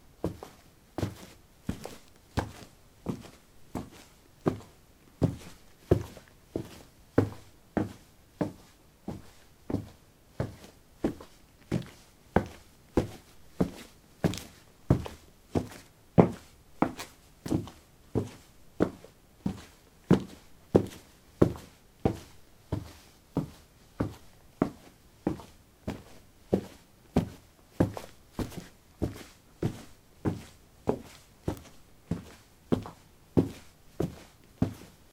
concrete 15a darkshoes walk
Walking on concrete: dark shoes. Recorded with a ZOOM H2 in a basement of a house, normalized with Audacity.
footsteps, shoes